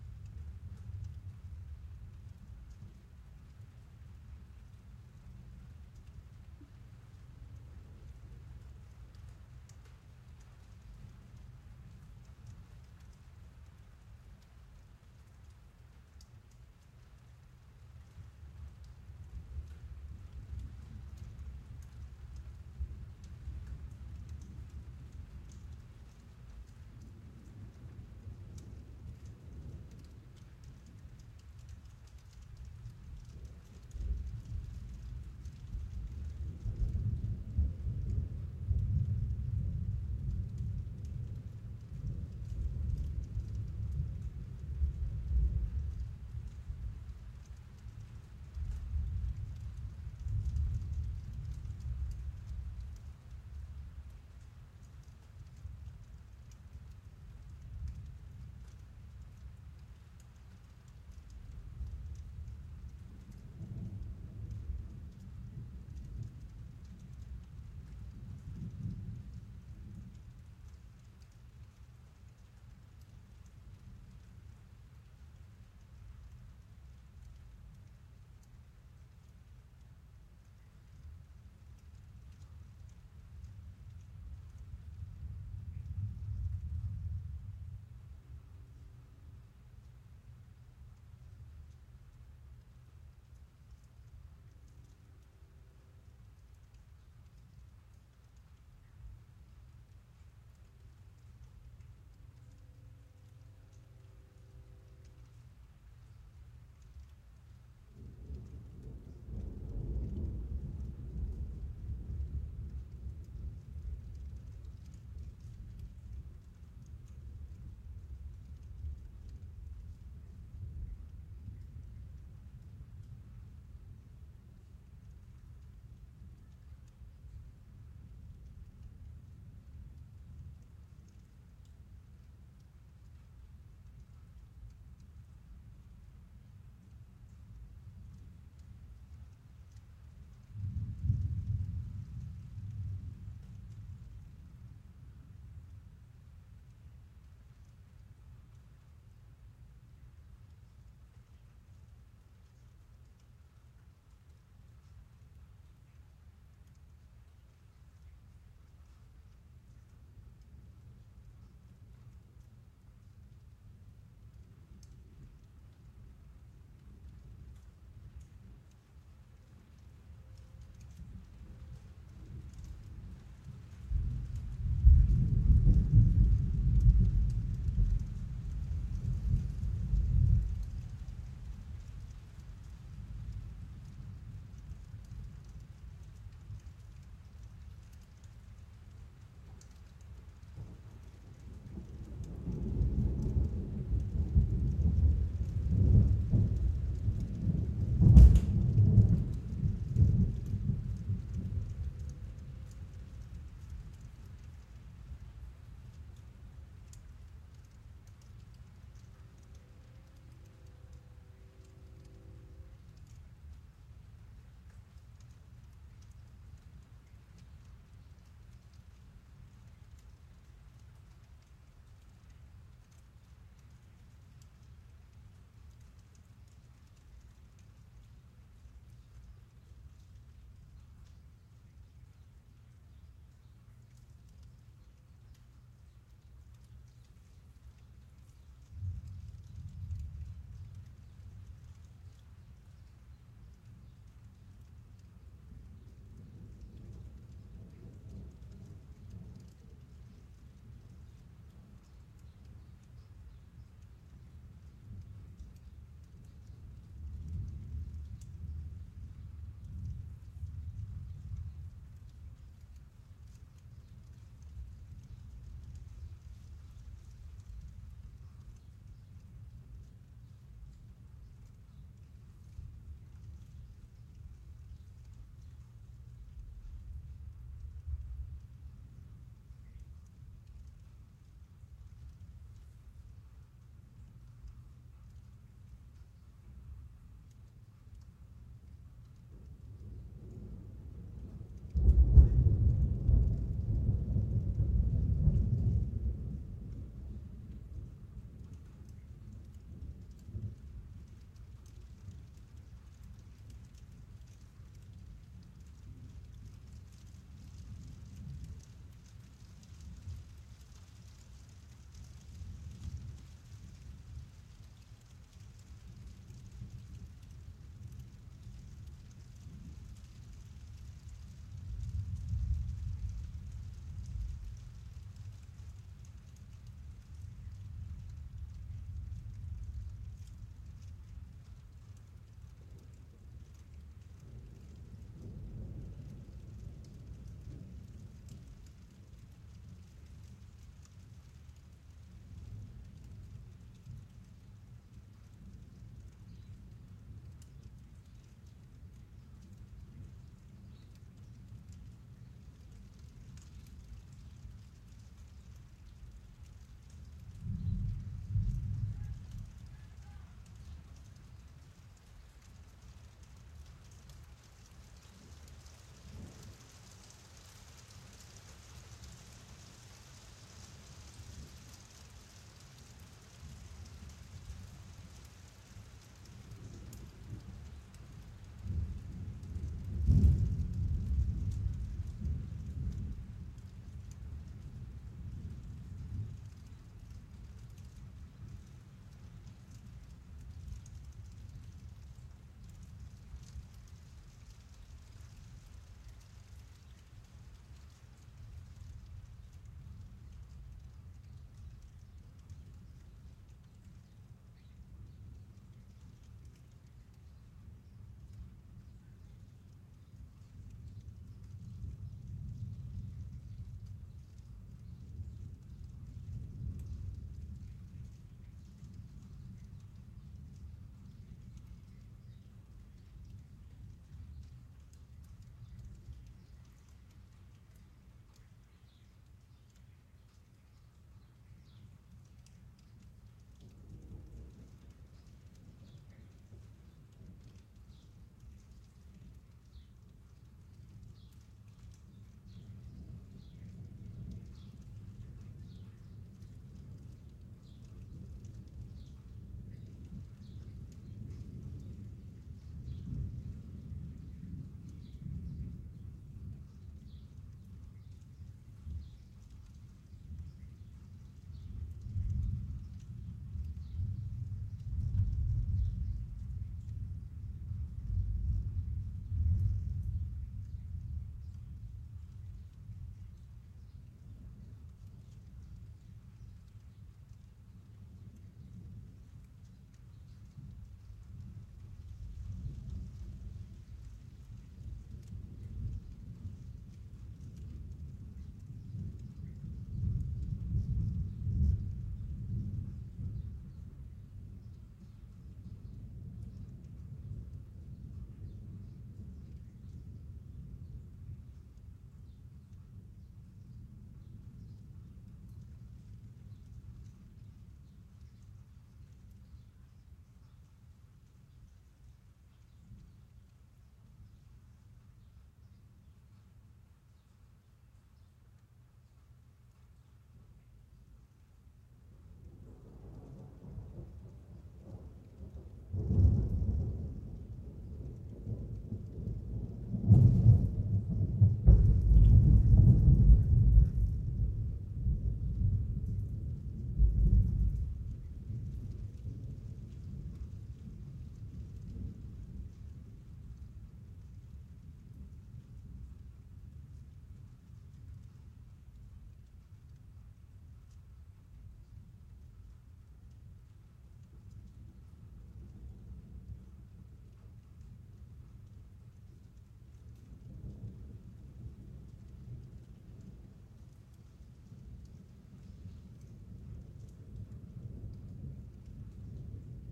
RainInsideExtended copy
The sound of rain falling on the roof of my garage. Recorded from inside the garage.
garage, hitting, indoor, inside, outside, Rain, roof